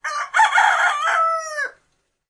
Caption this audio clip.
Raw audio of a cockeral crowing early in the morning. Recorded up in the Banaue mountains of the Philippines, at a distance of about 2 meters.
An example of how you might credit is by putting this in the description/credits:
The sound was recorded using a "Zoom H6 (MS) recorder" on 13th July 2018.